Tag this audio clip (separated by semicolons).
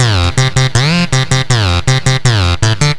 bass; 80